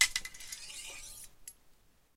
Two swords clash and slide off of each other (From the right).